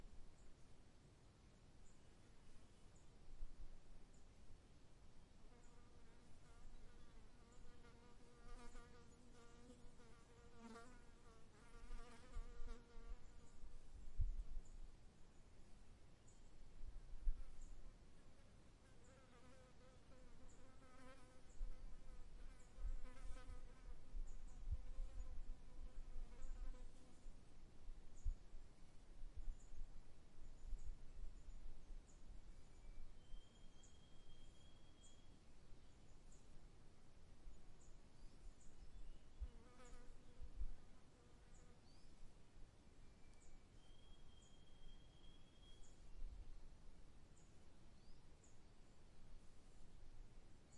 Front Pair of H2 in forest approx 50 ft from Lake Superior on hot summer July afternoon. Many birds.
field-recording, fly, summer, wind